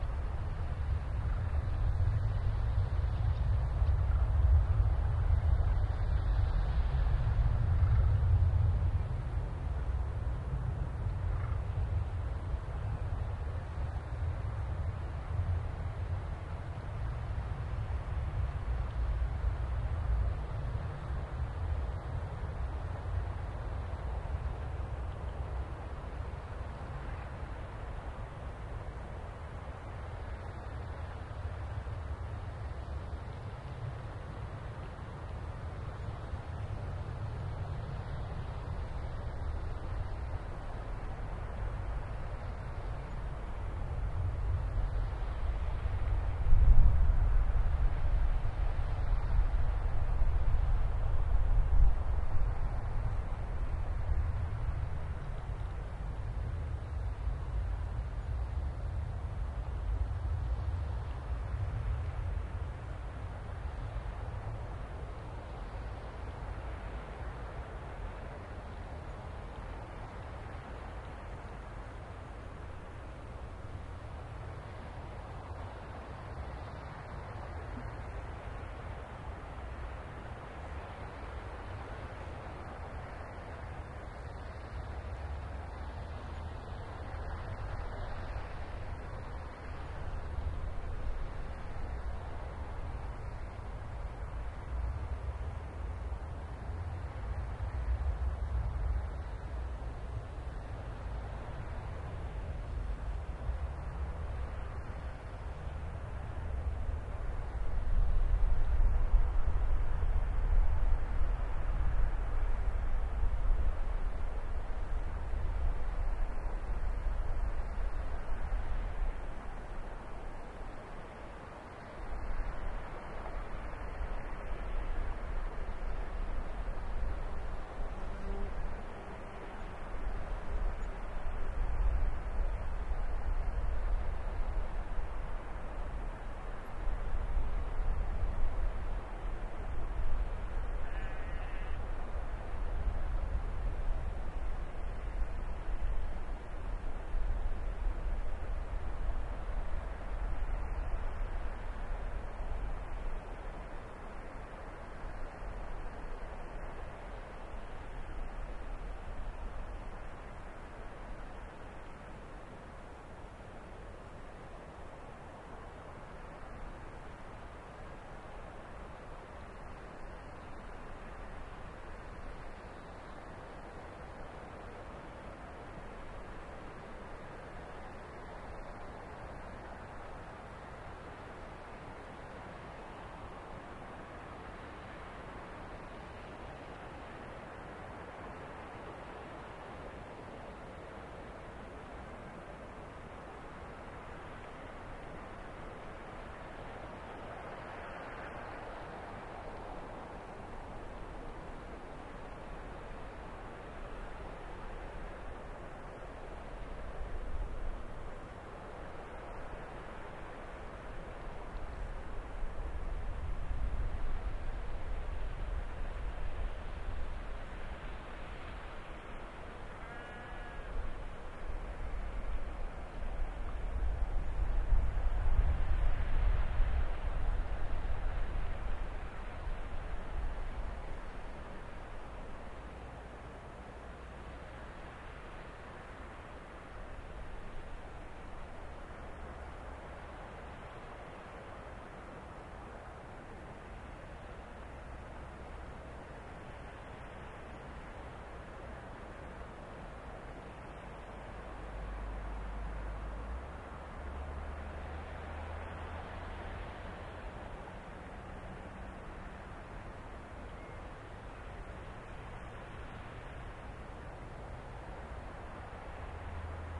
Highland near Lochan na Lairige
This recording was done on a windy evening in August 2010 near the Lochan na Lairige in Perthshire / Scotland. It sounds different at other times of the year. Curlews, redshanks and grouses can´t be heard, but a waterfall in the distance.
Recorded with two MKH40 microphones from Sennheiser and an Oade FR-2LE recorder.
evening, field-recording, flickr, highland, perthshire, scotland, waterfall